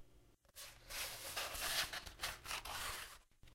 Unrolling Scroll
Unrolling a scroll or spell.
Parchment, Scroll, Spell, Unrolling